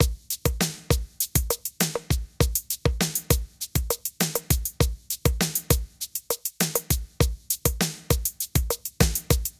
The drumloop of a never really started song.
drums, 100-bpm, loop, drum-loop